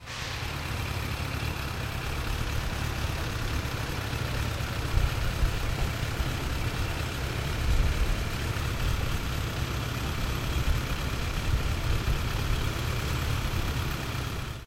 vehicles-engine 1
This is a recording of the sound of the motor of a vehicle.
car, engine, motor, SonicEnsemble, UPF-CS12, vehicle